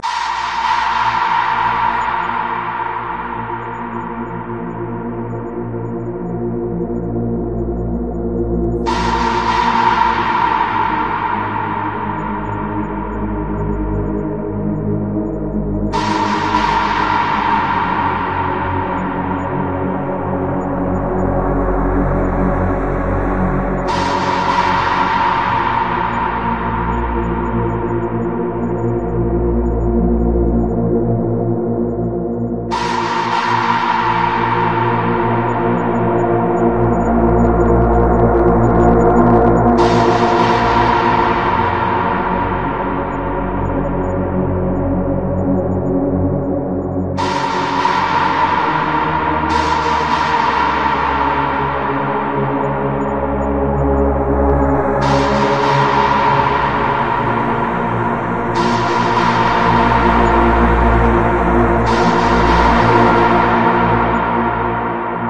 old music like the last of the mohicans but not the same but my own tune by kris
did this on keyboard on ableton hope u like it :D
bit-sad, film, soundscape